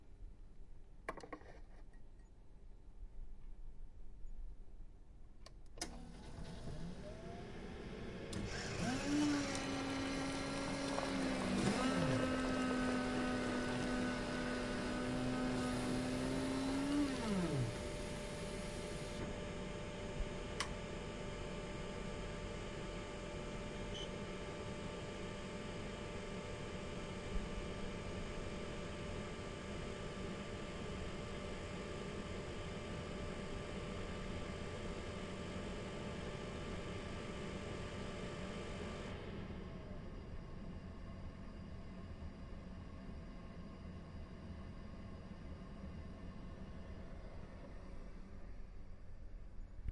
Coffee machine
A coffee cup being filled with delicious java. Just kidding it's actually being filled with hot chocolate. But who would know?
mechanical, kitchen, machine, Coffee, office, cycle, hot